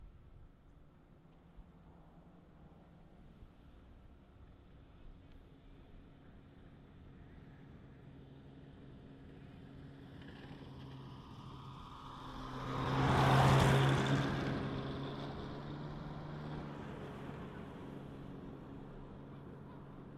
snowmobile pass by quick semidistant